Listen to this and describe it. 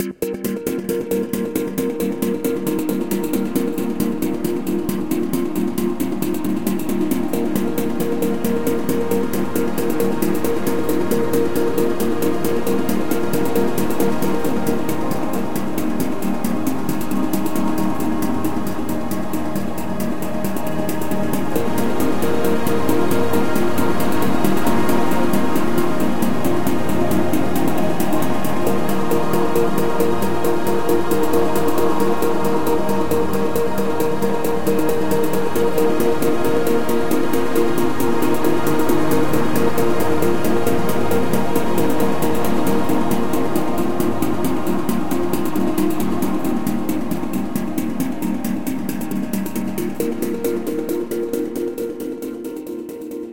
A rhythm midi made at the keyboard then processed twice with through DN-e1 virtual synthesizer in MAGIX Music Maker daw and the two voices stacked together.